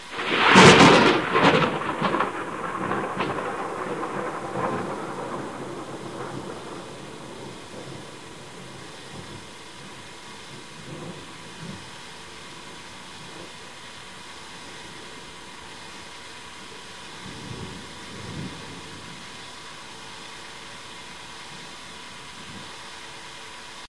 This is a thunder on 5th
of May 2001 when the lightning woke me up in a continuously storm. I
have recorded it with a single cassette recorder by the window and the
quality is not so good but the sound was very impressive.The evening
before this storm I took a photograph of lightning of the arriving
storm.